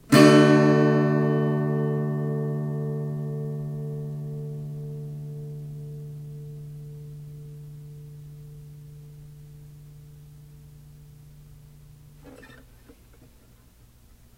student guitar chord 6
A full octave of basic strummed chords played on a small scale student acoustic guitar with a metal pick. USB mic to laptop.